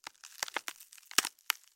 Bone Break 29
break, breaking, crack, horror, kill, neck, snap, vegetable